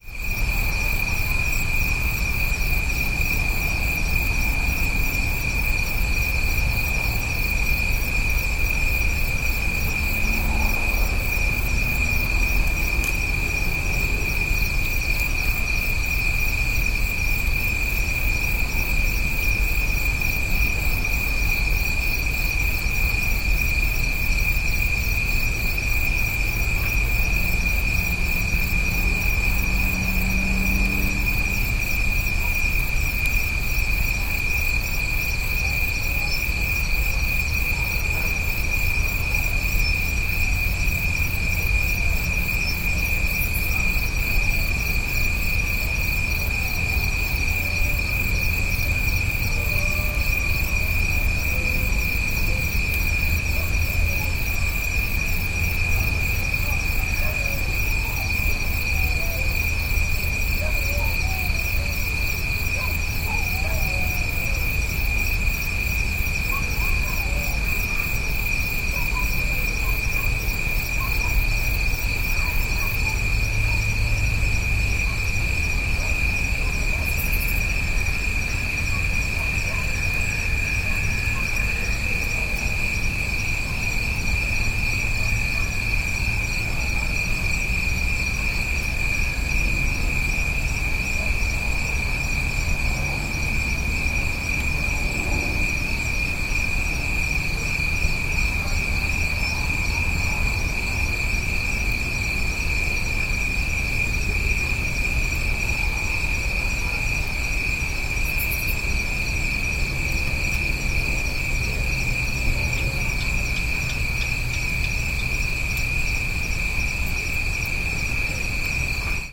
Thailand countryside at 6PM, recorded with DBX RTA-M microphone.
insects, field-recording, crickets, nature